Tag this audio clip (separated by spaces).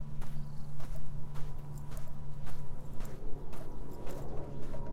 walk feet foot steps